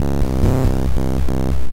lofi synth piece